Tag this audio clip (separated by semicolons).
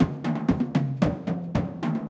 drum; loop